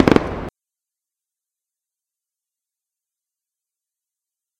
tre hit 01
recording of a triple firework explosion
ambience, distant, explosion, fire, fireworks, hit, loud, multi, outside, triple